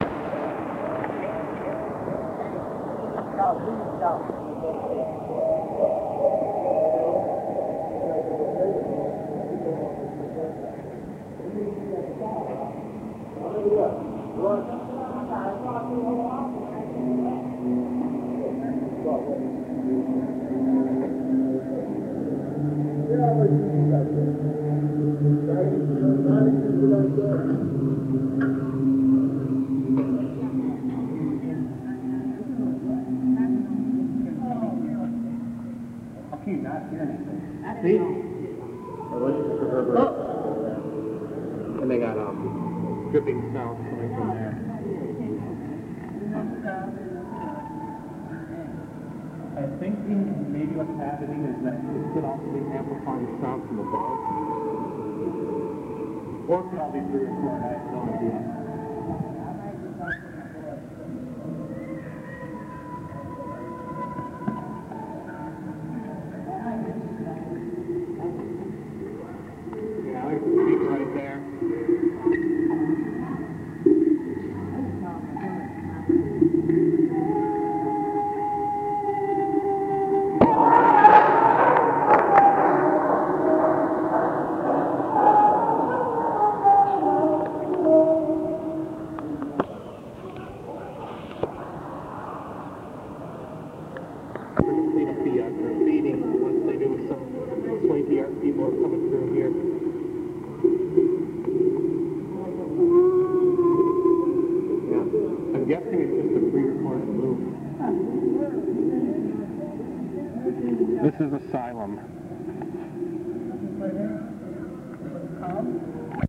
This is an alternate recording I took on 04/26/2014, on microcassette, of an art installation in Madison, WI titled "Asylum," created by Marina Kelly and Megan Katz. This was taken at the same time as the cassette recording I uploaded previously; if asked by Kelly or Katz I will respectfully take both down.
"Asylum" was a temporary art installation located in the Tenney Park passageway under East Johnson Street. From what I saw when I was there, it was just a speaker or two playing some ambient sounds. These sounds could have been generated based on environmental input, but due to lack of evidence I can't conclusively say that was indeed the case.
I was in a bad mood on this recording so apologies for the negativity/language.